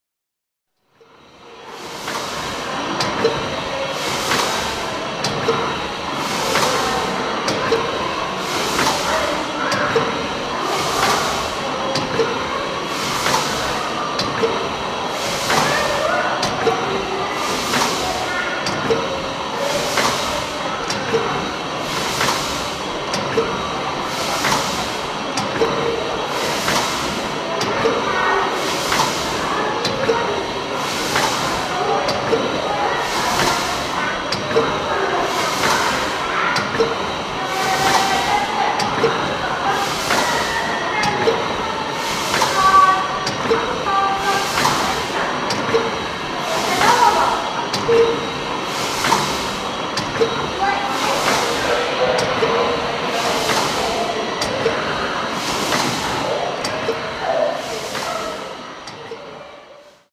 steam engine at museum 2
The Sound of a working Steam Engine at The National Museum of Scotland, Edinburgh.
Recorded on Mini disc with an Audio Technica ART25 Stereo Mic
engine, steam, machine